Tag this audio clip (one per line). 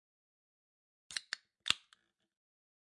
can
aluminum
object
soda
drink
beer
beverage
metallic